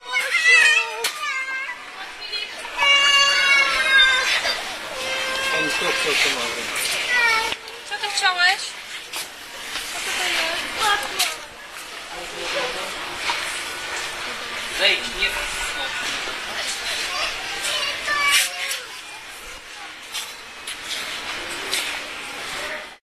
toys section 191210
19.12.2010: about 19.30. toys section in Real supermarket in M1 commercial center in Poznan on Szwajcarska street in Poznan.Poeple voices (parents and their children).